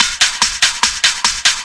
TECHNO LOOP spirals
loads of reverb added to this loop
loop, techno